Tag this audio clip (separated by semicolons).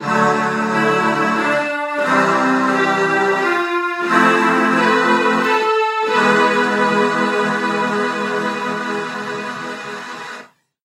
fantasy; levelup; adventure; rpg; game; success; victory; piano